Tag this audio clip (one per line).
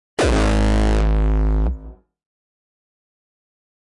Bass
Distortion
Drum
Fl-Studio-12
Gabber
Gabber-Kick
Hardcore
Hardcore-Kick
Kick
Raw